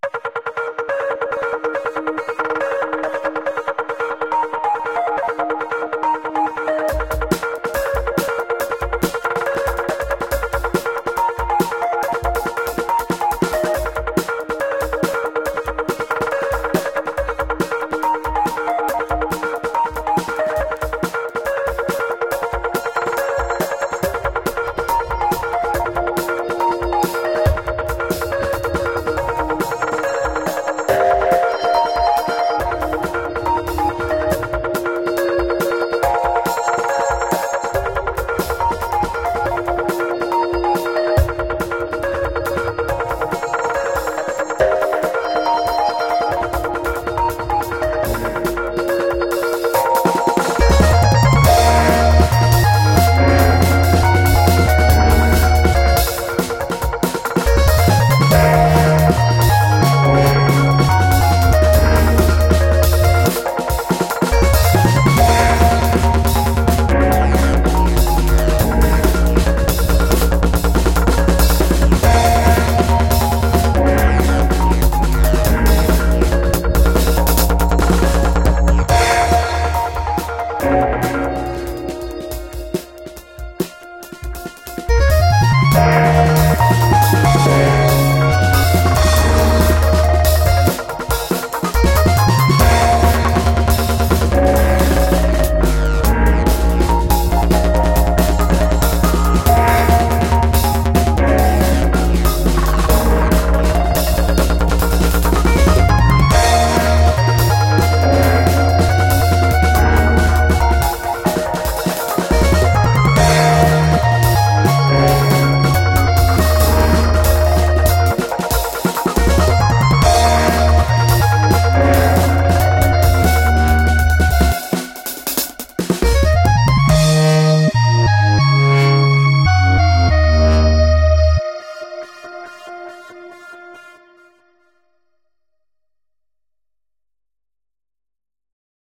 tilted synth dnb remix
DnB remix of Eroika´s "Tilted Synth 1 (140bpm)"
Jammed on the drums to this and added some synth and bassline stuff.
Drums recorded with one mic only(Rode NT1A)
THANK YOU Eroika for uploading so many great and inspiring sounds!!!
Breakbeat, 140bpm, Music, 424976, Uptempo, Drums, DnB, Synth, Electronic, Eroika, Remix